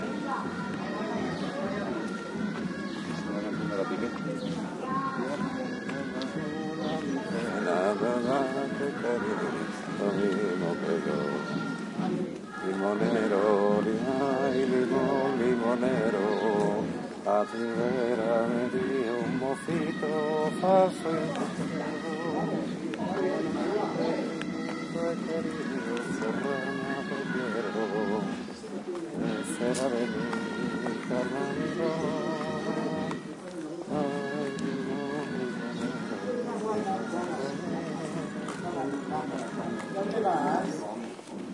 20110801 13.soft.singing
Santi sings softly in Spanish, over distant live music. The song is a popular tune (Limon limonero) made famous by Concha Piquer). PCM M10 with internal mics. Parque del Castillo, Zamora, Spain
ambiance, field-recording, folk, male, music, spain, spanish, voice